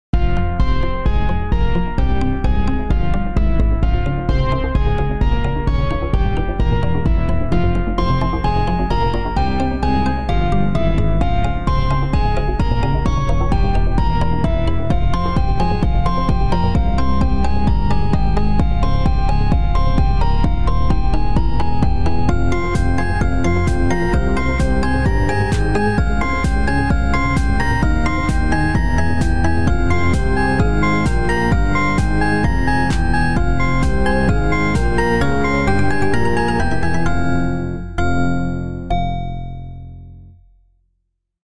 portal 40 sec
game, portal, rmx